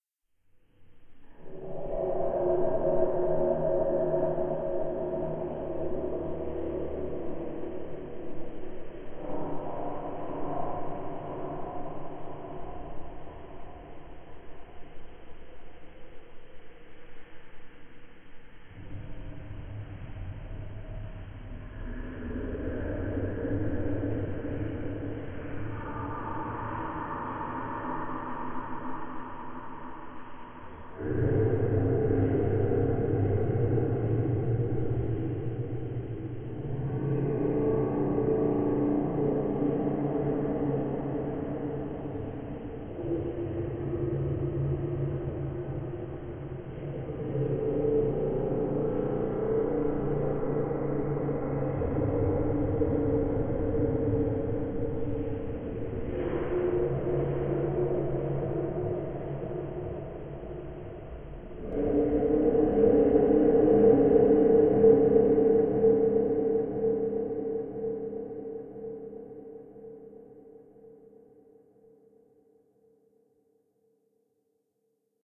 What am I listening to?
The original recording was actually a herd of sheep that I recorded for a Bible Project. A few changes in Audacity developed what can only be described as spooky. My six year old would not stay in the same room when it was played.